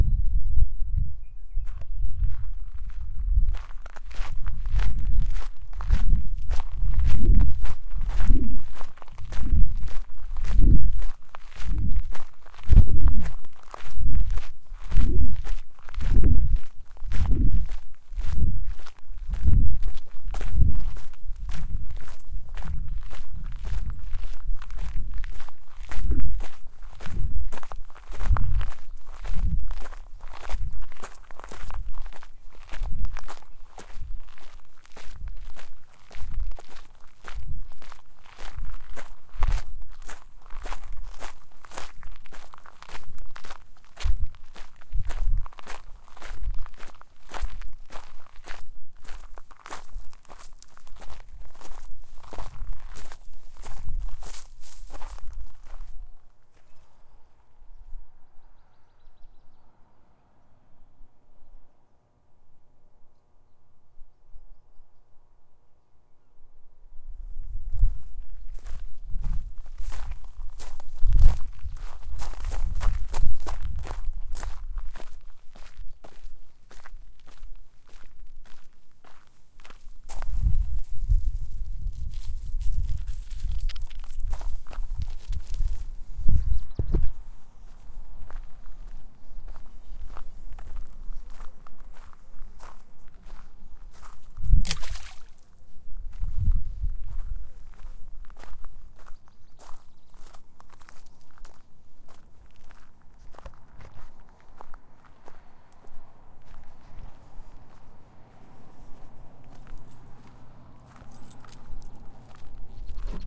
Footsteps on gravel, birdsong, power transformer, rock splash, highway traffic.
powerline, rocksplash, field-recording, birdsong, gravel, traffic